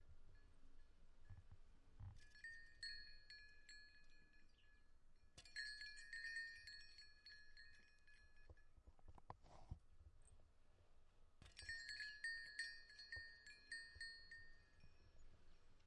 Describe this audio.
a recording of the several wind chimes in my backyard